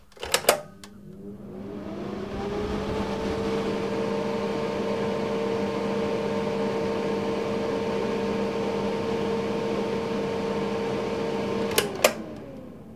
Extractor Fan 02
ventilator, fridge, vent, extractor, wind, air, ac, refrigerator, air-conditioning, fan